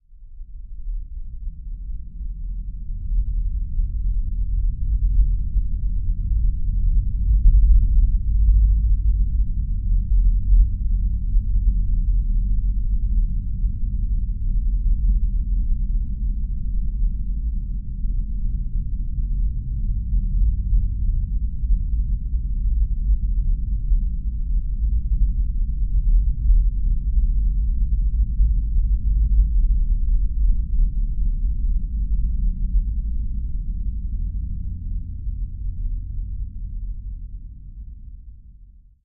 Low rumble, could resemble thunder in the distance or low frequency rumble.
rumble, storm, deep, bass, low-rumble, thunder